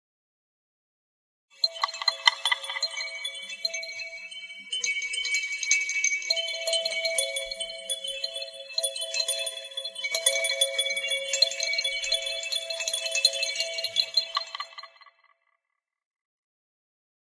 Found this plastic tube while digging in the garden. Apparently part of childs toy. Now the cat enjoys rolling it around. It's soothing like a tuned wind chime. Give a listen.